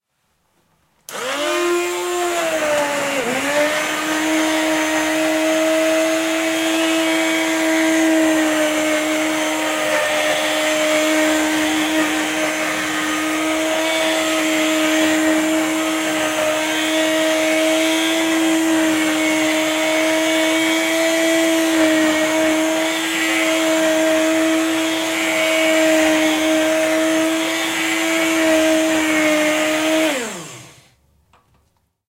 Handheld Blender
A mono recording of blending vegetables in a pan to make a soup. Rode NT4 > FEL battery pre-amp > Zoom H2 line in
blender, cooking, liquidiser, macerator, mono, soup